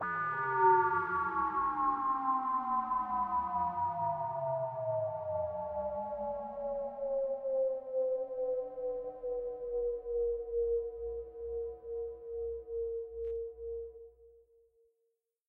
Falling Deep 1
drum and bass FX atmosphere dnb 170 BPM key C
bass, C, 170, BPM